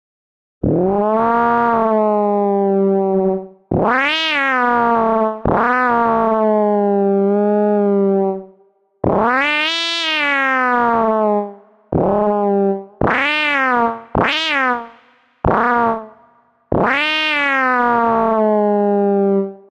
Synthesised Cat Vocals
Accidentally made using SynPlant.
Not to be taken seriously.
Maow.
Cat, Synth, Vocals